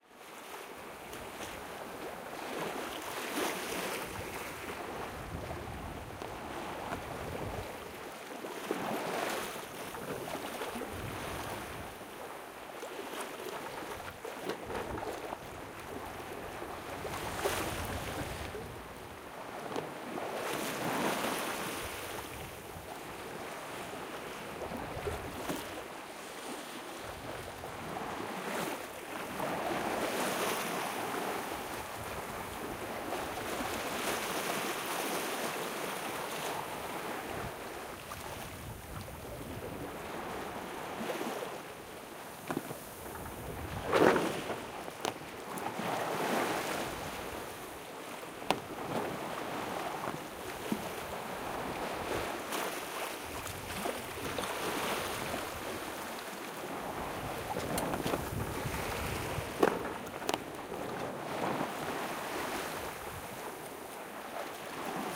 Sailing from Spain to Canary islands in October with a 12 meter yacht. Wind speed was around 10 knots. I positioned the recorder close to the railing at the starboard side. Recorded with an Olympus LS-12 and a Rycote wind shield.